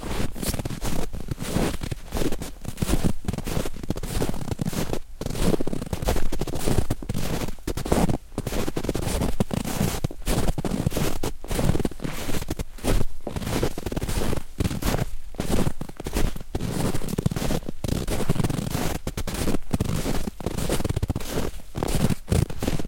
fotsteg mjuk snö 4
Footsteps in soft snow. Recorded with Zoom H4.
footsteps, soft